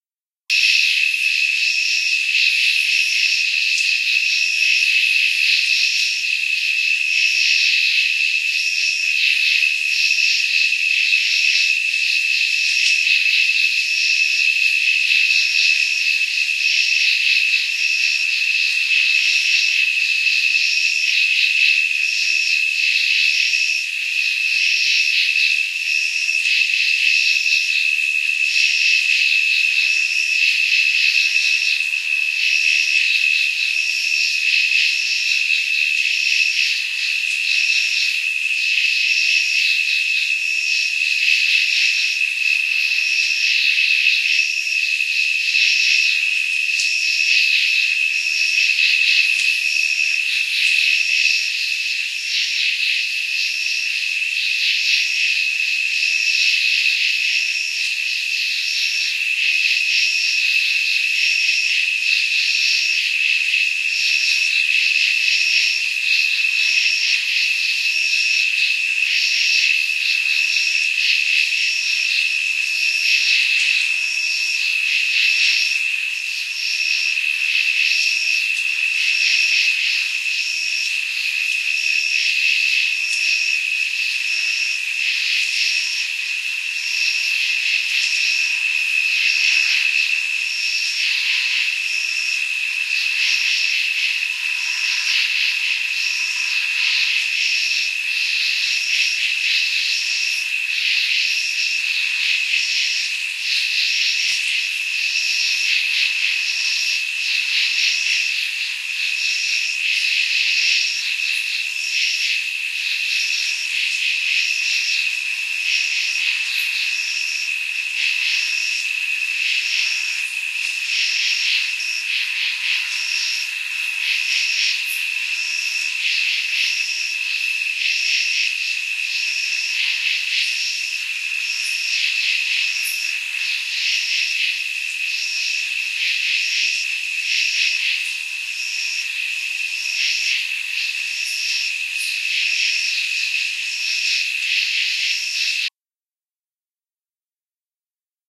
Insects at midnight recorded in a remote rural area on a Sony HiMD MiniDisc recorded using a Rode NT-4 stereo microphone covered with a Rycote windscreen.